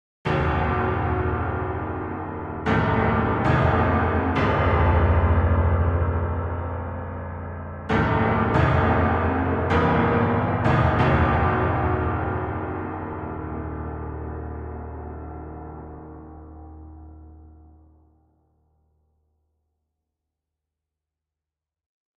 a piano recorded with ableton to make it sound dark and scary